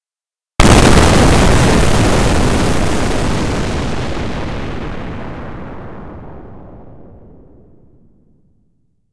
spaceship explosion9
made with vst intrument albino
explosion, soldier, impulsion, military, gun, noise, fx, battle, shooter, sound-design, spaceship, energy, laser, shooting, fighting, shoot, rumble, space, war, fire, future, warfare, futuristic, impact, torpedo, blast, sci-fi, firing, atmosphere, weapon